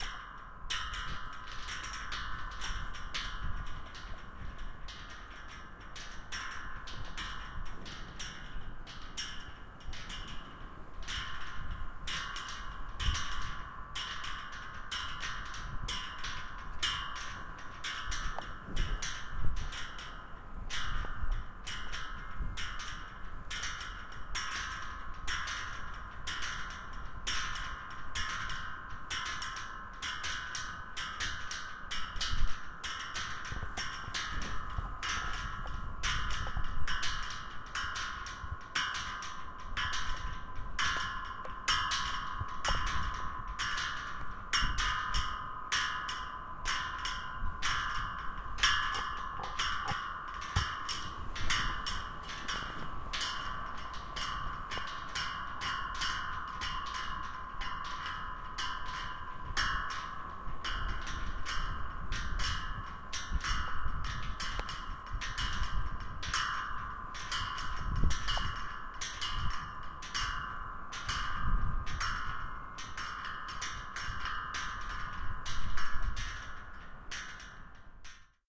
Nelsons-monument metal wire vibrating
bounce, dreamlike, electronics, great, metal, nnsca, vibrate, vibrating, wire, yarmouth